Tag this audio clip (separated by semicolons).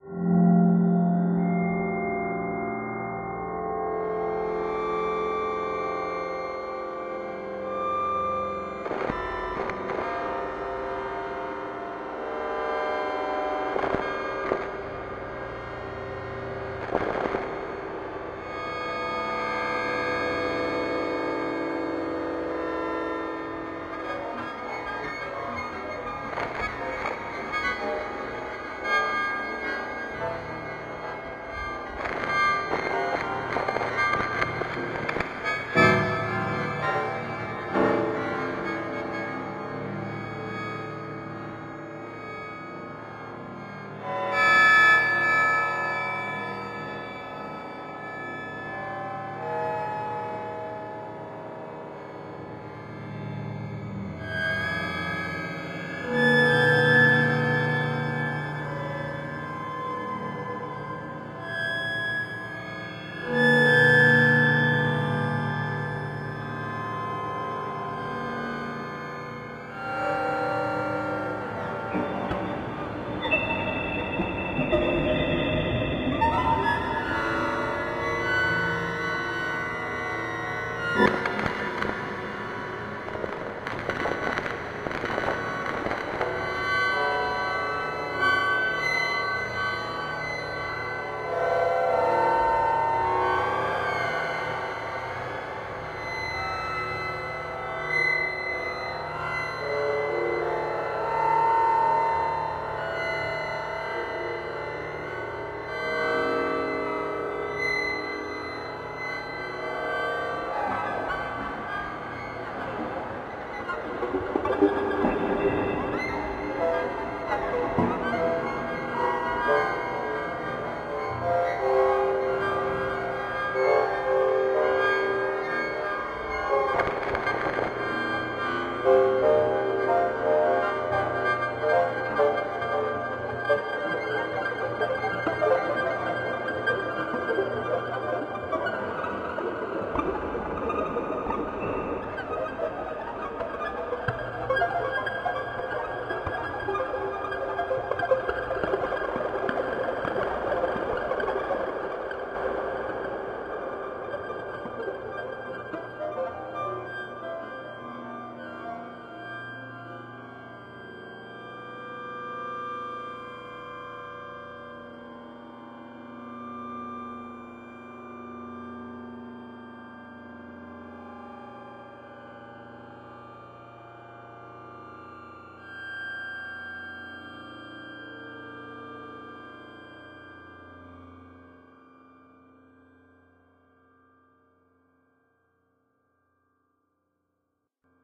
soundscape; synthesized; metallic; abstract; resonant; Alchemy